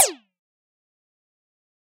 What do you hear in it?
Lazor-Short-Mid2
A cheesy laser gun sound. Generated using Ableton Live's Operator using a pitch envelope and a variety of filtering and LFOs.
shoot, lazer, laser, weapon, sci-fi, zap